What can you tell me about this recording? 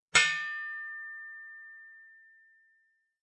metal ring 01
metal bowl with decent ring to it. high pitched
metal, ring